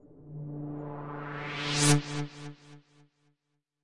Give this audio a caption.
Fleeting Glance
A short swelling sound with a stab at the end. Made with Crystal AU.
fx, rising, swell, synth